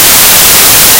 Sweep Sough Swoosh Swish
It´s a little Swoosh created with Audacity. White swoosh. Like in TV-Shows. Maybe it can be used in horror-things.
fail, failure, horror, slender, sough, sweep, swish, swoosh, tv